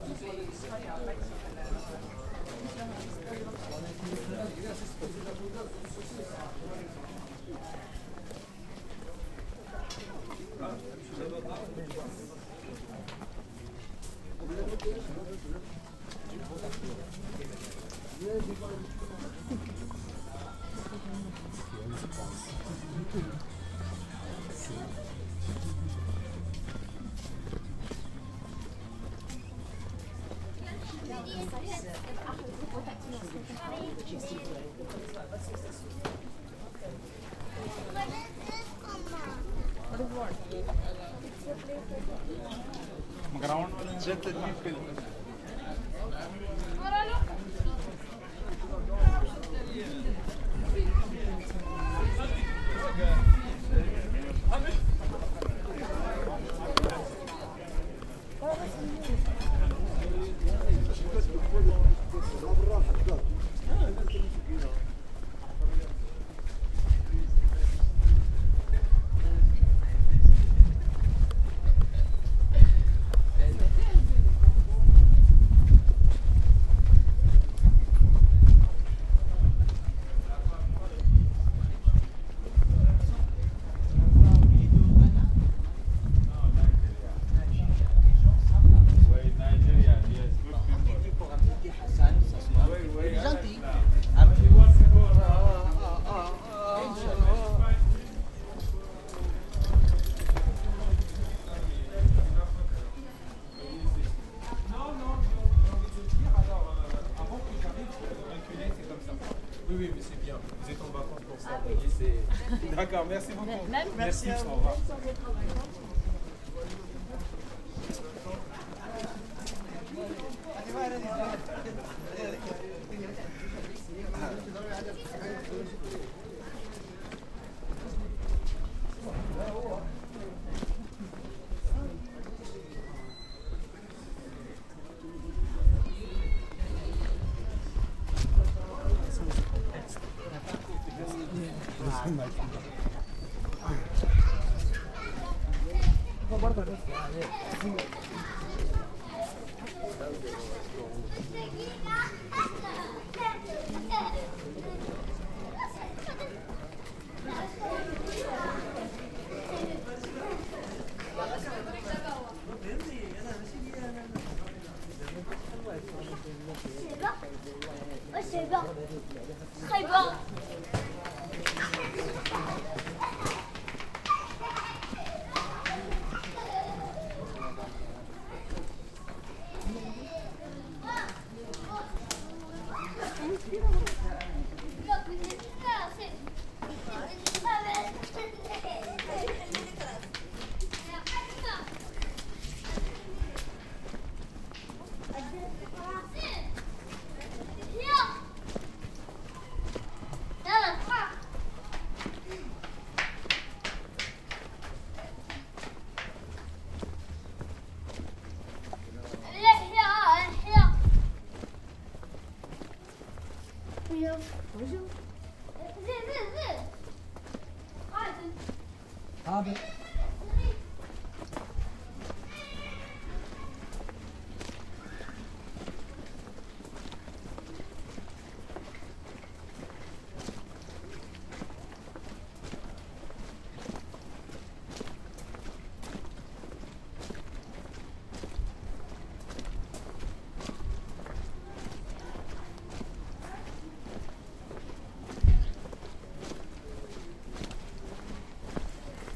Marrakech Walking Souks
Walking through Marrakech souks early evening.
Africa, ambience, noise